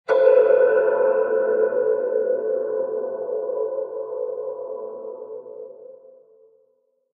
horror-effects hit suspense metal impact metallic percussion
hit, horror-effects, impact, metal, metallic, percussion, suspense